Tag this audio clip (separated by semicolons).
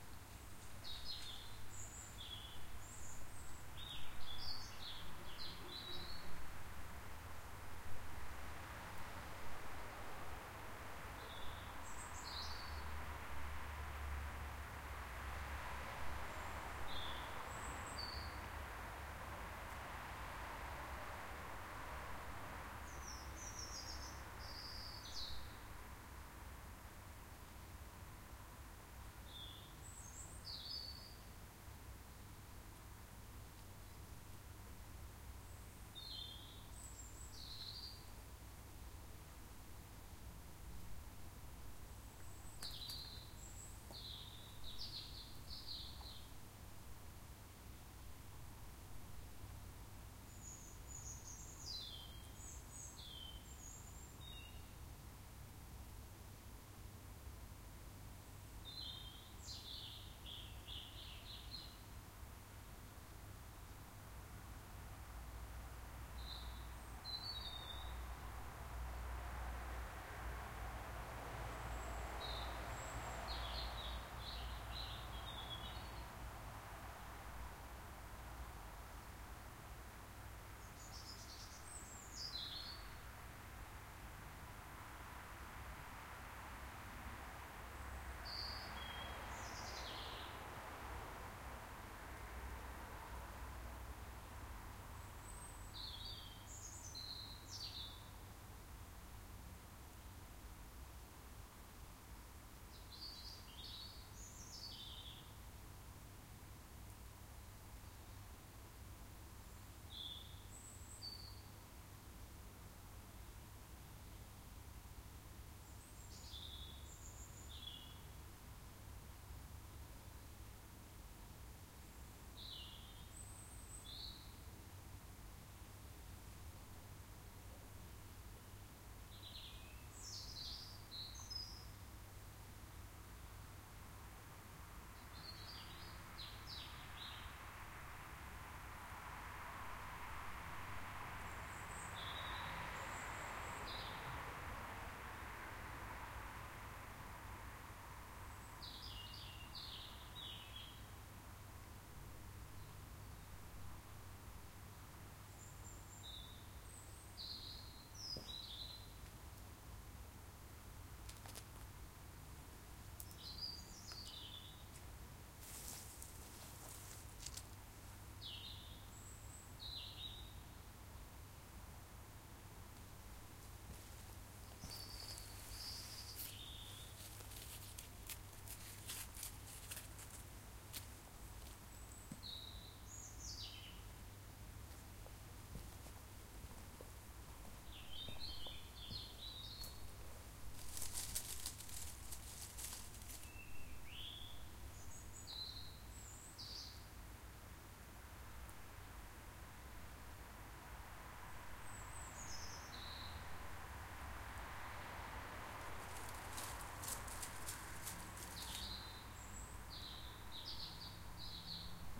ambience; ambient; Back; birds; birdsong; field-recording; garden; nature; outside; yard